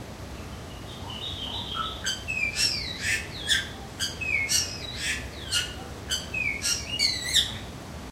bali starling03
Another song from a Bali Starling. Recorded with a Zoom H2.
aviary, bird, birds, exotic, field-recording, starling, tropical, zoo